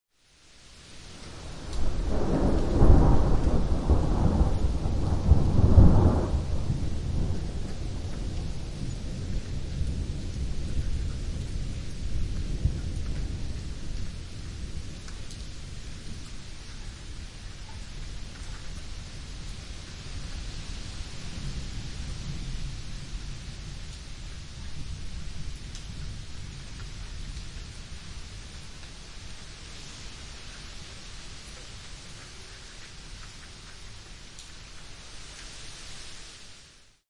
Suburb ambience, light rain, raindrops on concrete, heavy wind, thunders. Recording was made with 3DIO + Sound Devices Mix Pre 10 II.